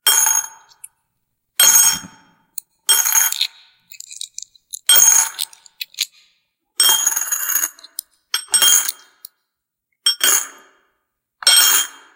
Dropping different denominations of coins into a ceramic bowl.